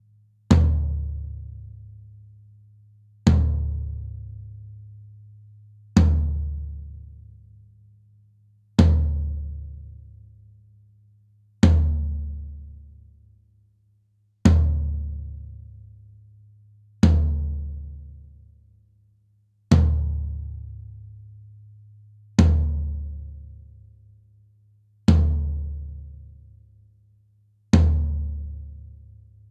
Ganon Low Floor Tom
recording of a low floor tom drum
drum, hits, kit, tom